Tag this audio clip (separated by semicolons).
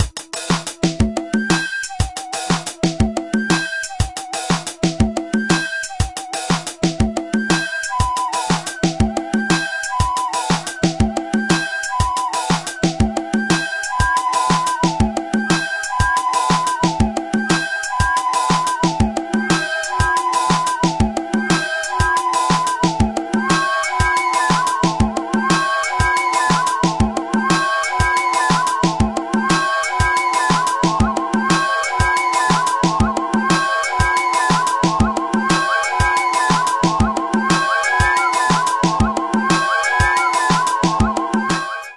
beat; drums; hip-hop